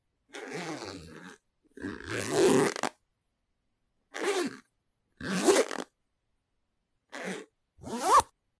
Zip Unzip
Unzip and zipping a small zipper on a case.
unzipping, zipping, case, zipper, coat, zip, jacket